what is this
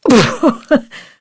CLOSE FEMALE LAUGH 018
A well-known author visited the studio to record the 'audio book' version of her novel for her publisher. During the 16 hours (!) it took to record the 90,000 word story we got on really well and our jolly banter made it onto the unedited tracks. The author has given me permission to keep and share her laughter as long as I don't release her identity. Recorded with the incredible Josephson C720 microphone through NPNG preamp and Empirical Labs compression. Tracked to Pro Tools with final edits performed in Cool Edit Pro. At some points my voice may be heard through the talkback and there are some movement noises and paper shuffling etc. There is also the occasional spoken word. I'm not sure why some of these samples are clipped to snot; probably a Pro Tools gremlin. Still, it doesn't sound too bad.
author, book, c720, close, empirical, female, funny, giggle, girl, guffaw, happiness, humor, humour, jolly, josephson, joy, labs, laugh, laughter, mic, microphone, mirth, novel, npng, over, story, voice, voiceover, woman